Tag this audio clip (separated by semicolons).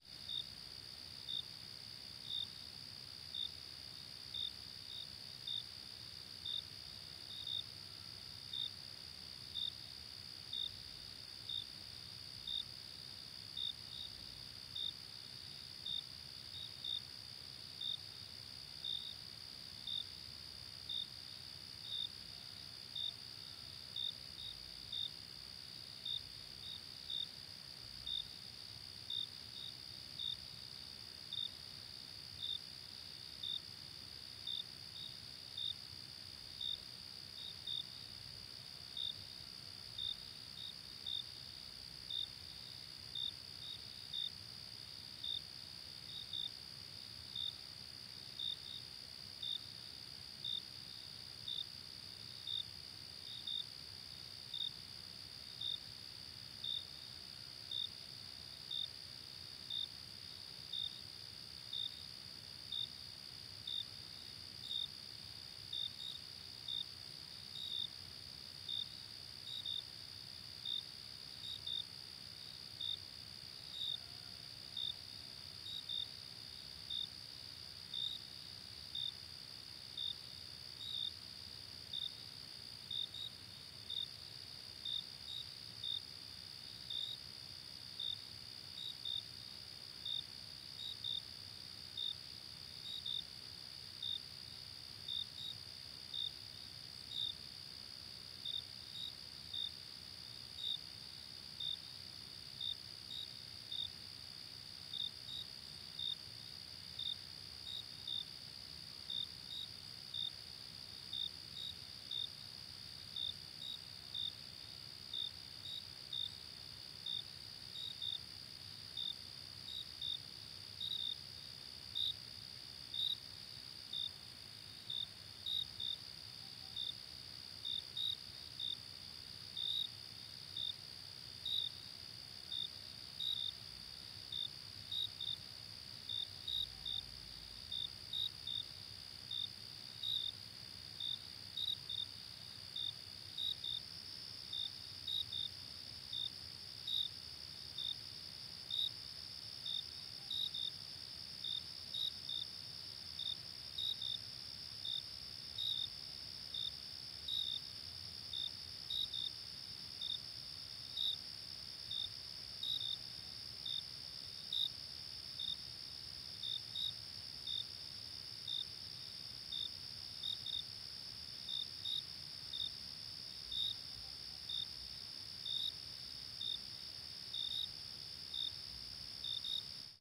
Animals; Insects; Night